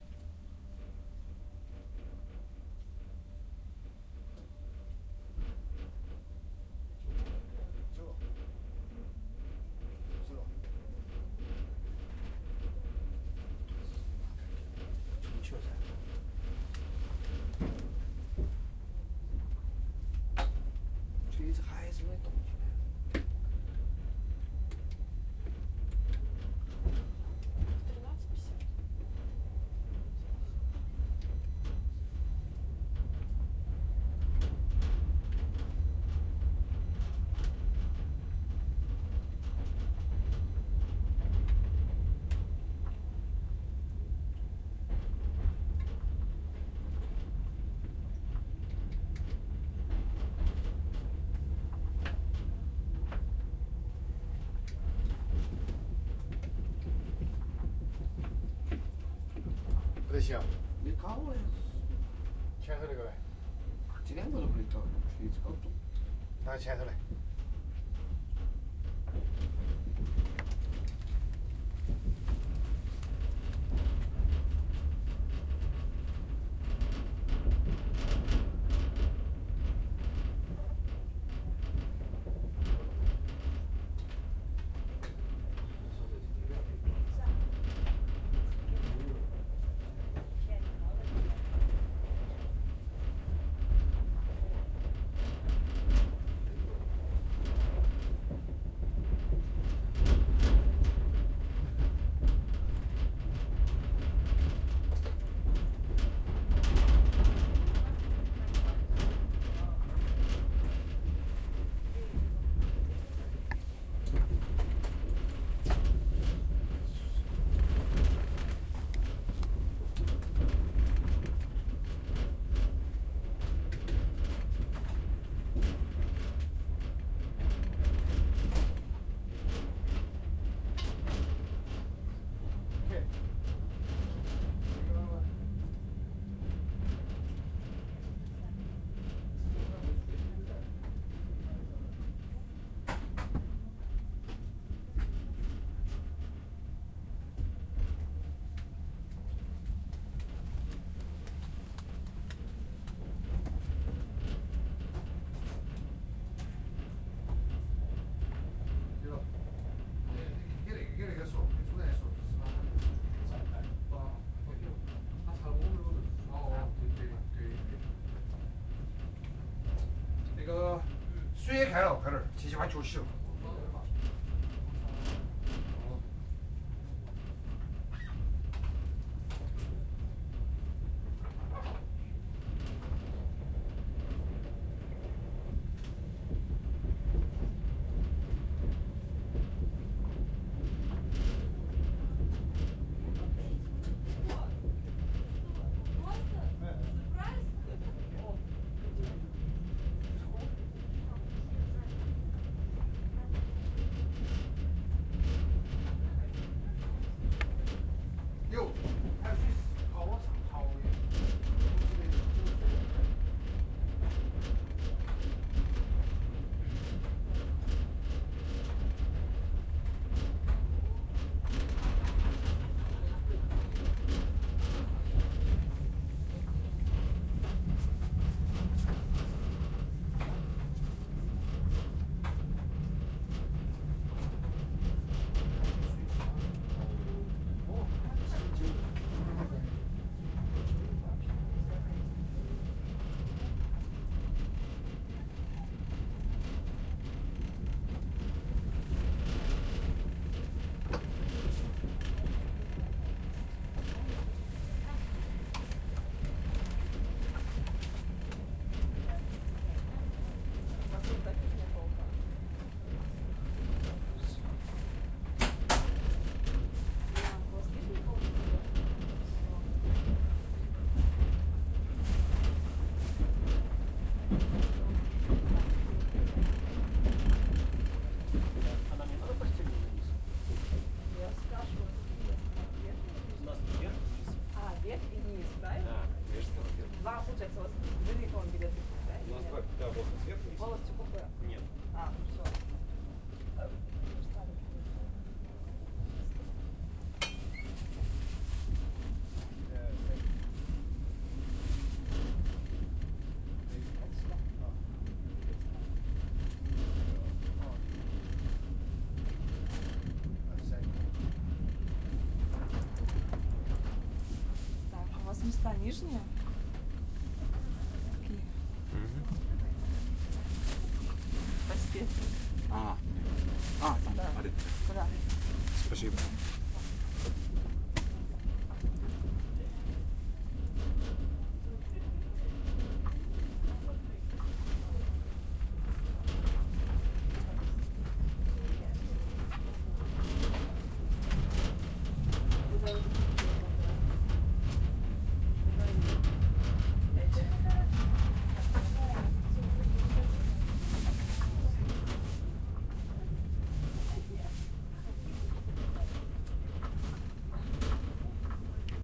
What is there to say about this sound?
Peaceful train cabin in the evening. Vladivostok - Khabarovsk
Train movement from inside the cabin. Soft and pulsating, some rattle. Recorded with Tascam DR-40.
A night to own. Staying up later than the group, watching over in exchange for privacy. Trading sleep for space and discovering a pattern, others tapped to the same deposit. With drowsy gazes we are bind in silence, mere repeating reflections against the dark.
rattle, vibrations, trans-siberian, rail-way, field-recording, train